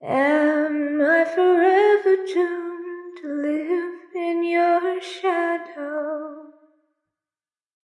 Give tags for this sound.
reverb; clean; female; vocal; voice; lyrics; singing; sing; girl; vocals

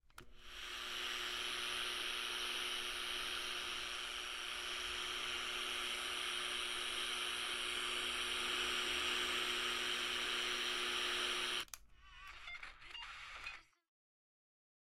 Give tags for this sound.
Radio; Talkie; Walkie

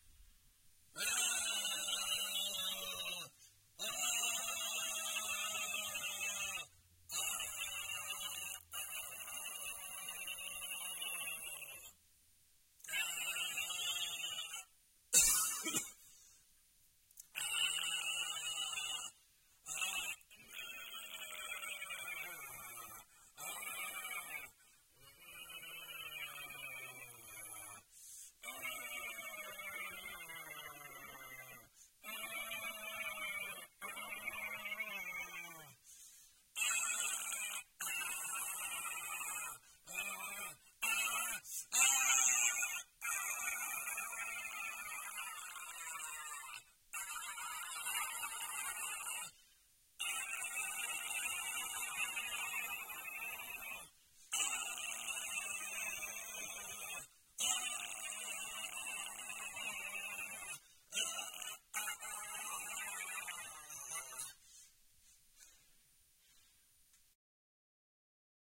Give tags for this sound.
ahhh; constipation; grovel; grunt; grunts; hurt; man; moan; ouch; pain; painful; wound

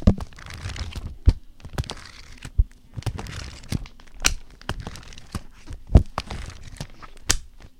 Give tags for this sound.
Essen; Germany; January2013; SonicSnaps

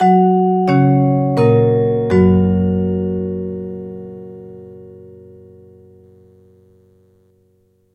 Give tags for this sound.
airport; announcement; automated; beginning; gong; intro; platform; railway; station; tannoy; train

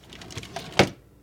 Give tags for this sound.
Drawer; Household; Junk; Kitchen; Small; Wood; Wooden; close; shut

